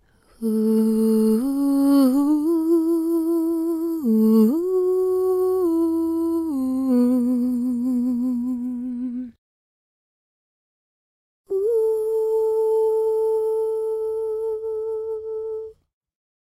Oooooo Accapela KatyTheodossiou
'Katy T', female vocalist humming. Recording chain - Rode NT1-A (mic) - Sound Devices MixPre (Pre Amp) - Creatve X-Fi (line-in).
vibrato, breathy, katy-theodossiou, voice, ending, vocal, oh, la, female, hum, chorus, singing